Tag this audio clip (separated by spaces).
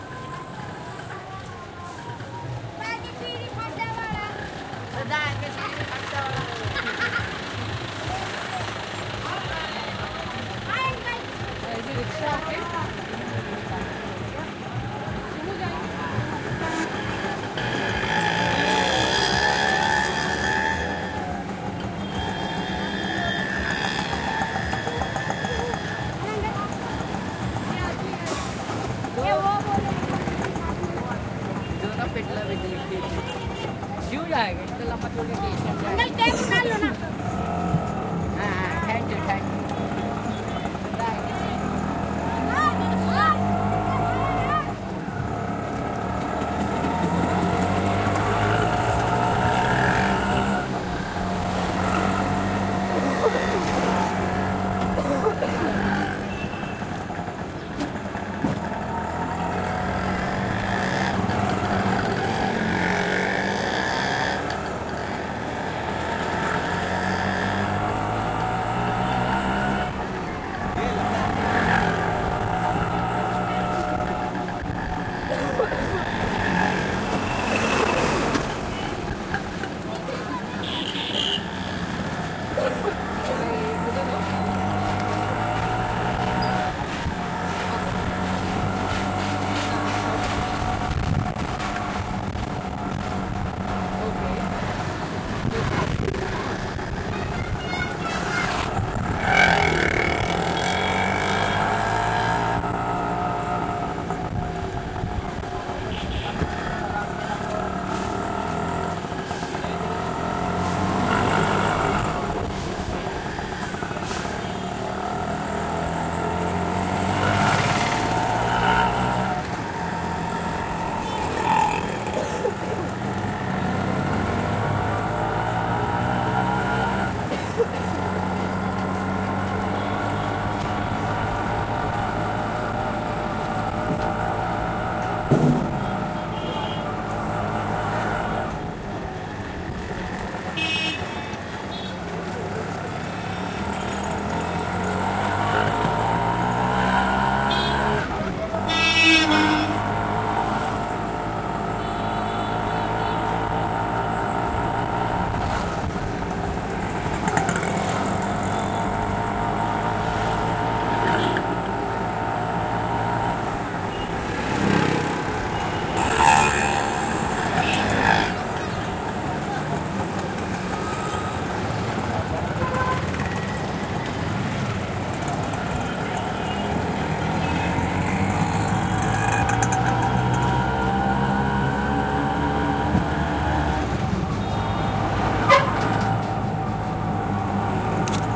India medium rickshaw ride speed throaty